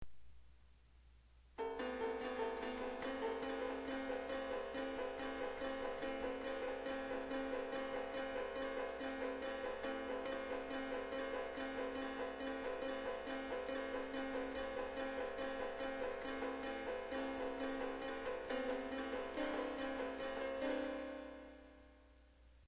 Perteneciente a parte del proyecto de animación 3d "Monstruoso" Esta canción de fondo representa la escena en la cual la protagonista es perseguida.